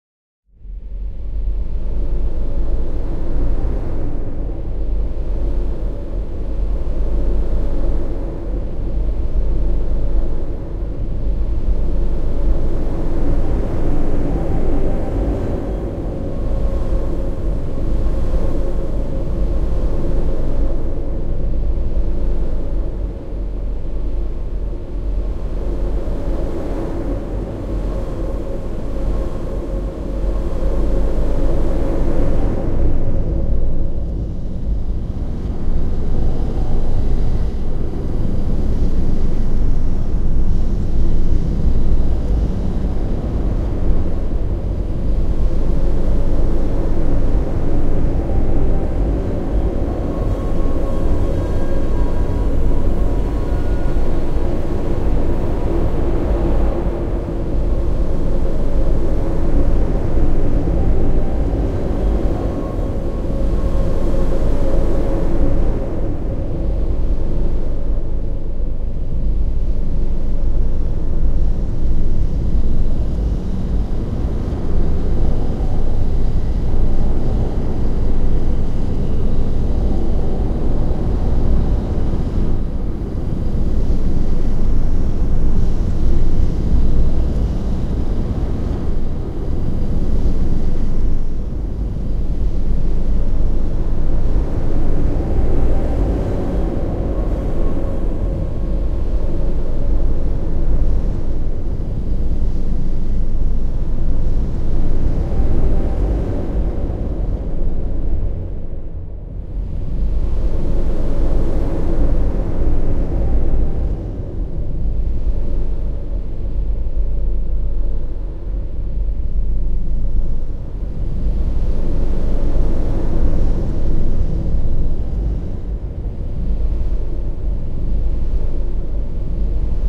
Sand and Wind Atmo
Amb, Ambiance, Ambience, Ambient, Atmo, Atmosphere, Cinematic, Creepy, Dark, Drone, Eerie, Environment, Fantasy, Film, Horror, Movie, Oriental, Sand, Scary, Sci-Fi, Sound, Sound-Design, Spooky, Strange, Wind